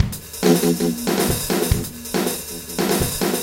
Punched in the chest 140
Awesome drum kit with a kick ass beat
mix, chest, drum, music, loops